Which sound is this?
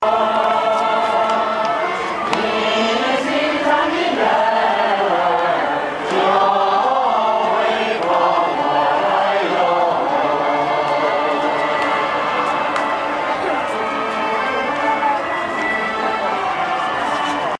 Jing%20Shan%20Activity
A short recording of a group of old folks singing in JingShan Beijing.